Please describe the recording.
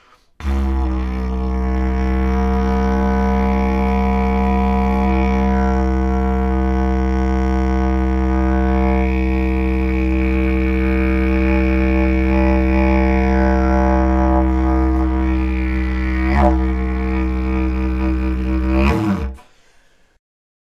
Droning on eucalyptus didgeridoo, recorded in a small room with a large-diaphragm condenser mic.
aboriginal, australia, didjeridu